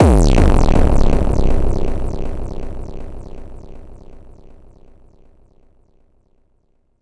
Dirty Hit
Distorted, delayed kick made on a Novation Nova
boom, bang